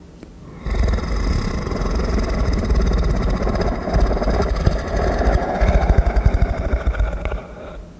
Soft Growl 2
Another soft, rumbling creature growl.
creature, creatures, creepy, horror, monster, scary